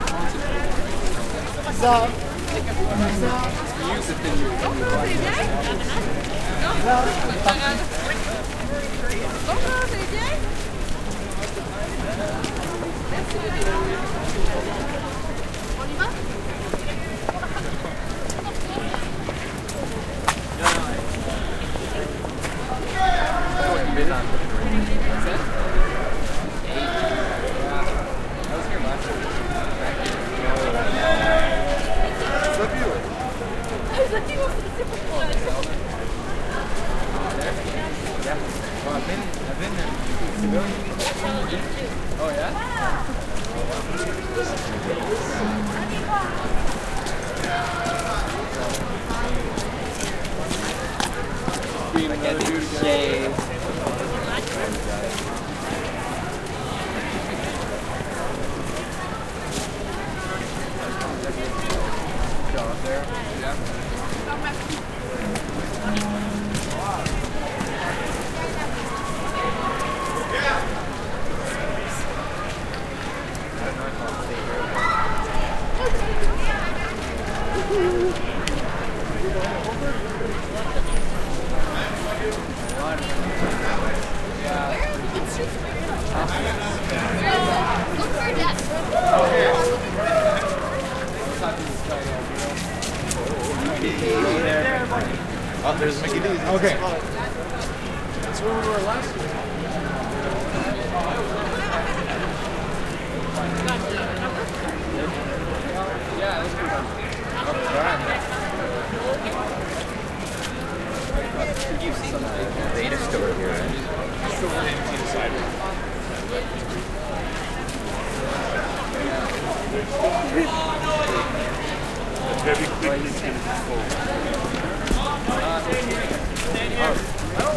crowd ext footsteps boots wet gritty sidewalk winter
crowd, gritty, wet